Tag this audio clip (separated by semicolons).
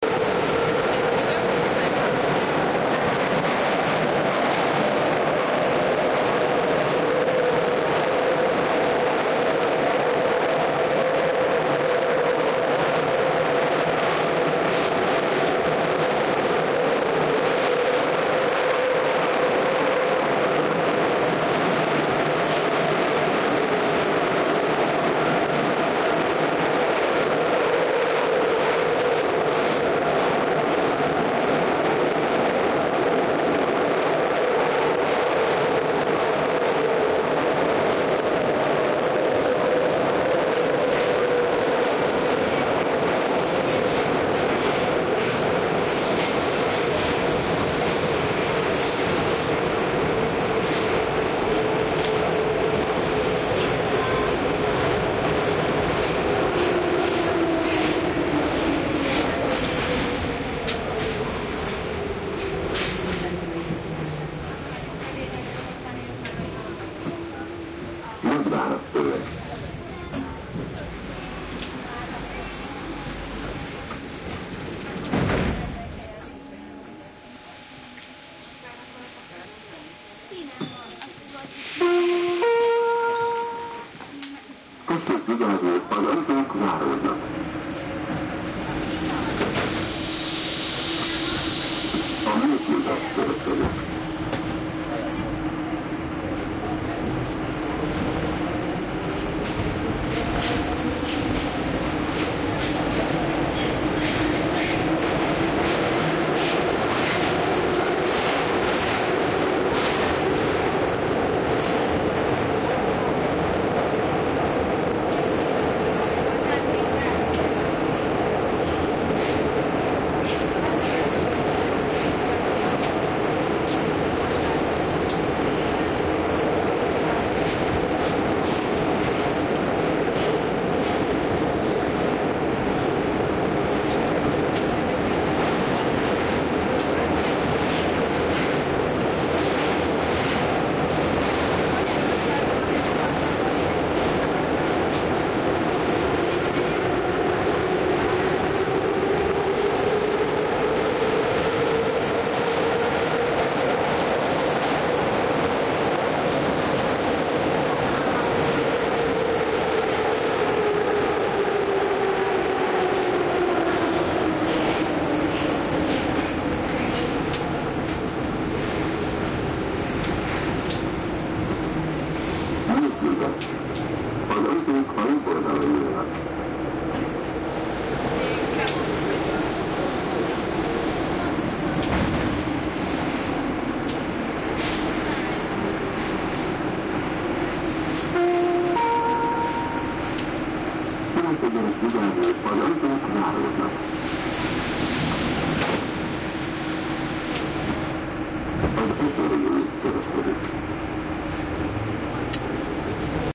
field-recording; noisy; hungary; budapest; metro; loud; recording